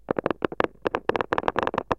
8 - Revenge of the Plastic bottle
That, plastic